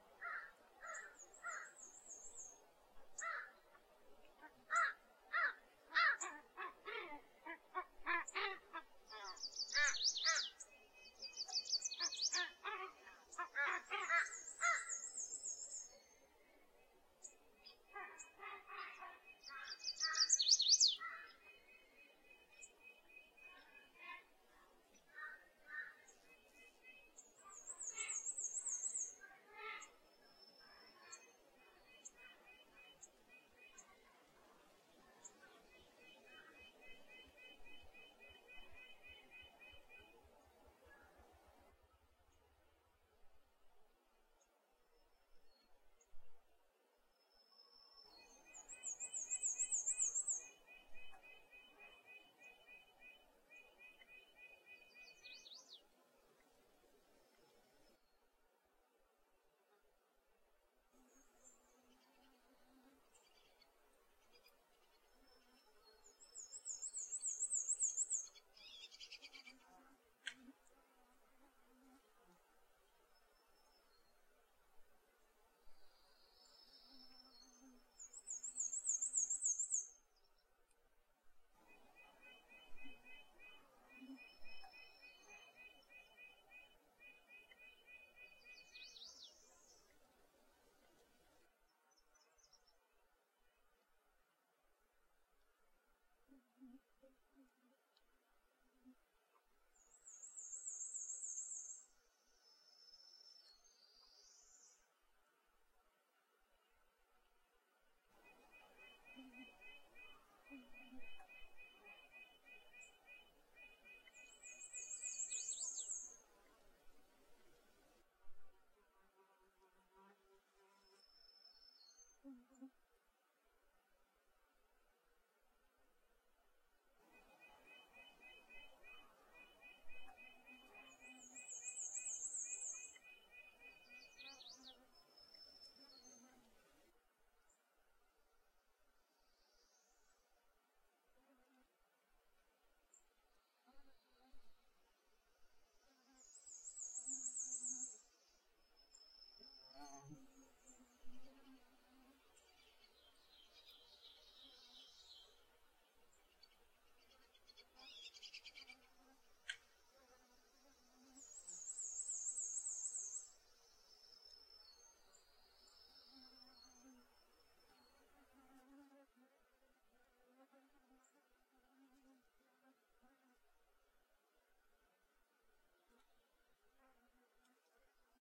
WILDTRACK Mixed woodland in Minnesota USA
Wildtrack of a mixed, swampy deciduous/coniferous woodland in Minnesota USA with crows calling and birdsong
atmos, birdsong, calls, crow, daytime, field-recording, forest, icuttv, minnesota, nature, song, soundscape, usa, wildlife, wildtrack, woodland, woods